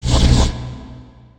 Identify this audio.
roboth breath
robot sound
sound recorded and processed with vst